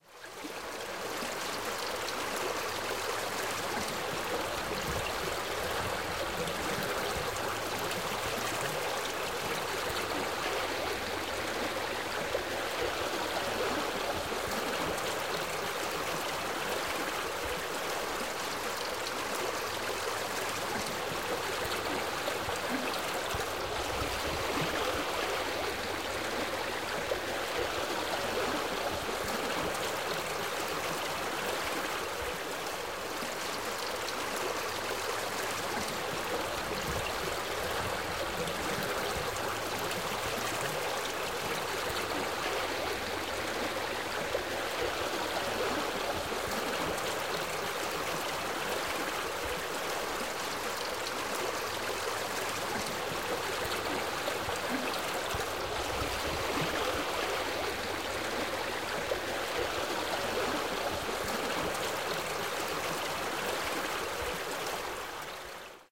This is a larger stream in the Shawnee National Forest. At this particular point on the stream had a large log over which the water was flowing gently....not as much gurgling and popping sounds, just a nice smooth, flowing sound.
ambience, spring, serene, peaceful, nature, gurgling, forest, nature-sounds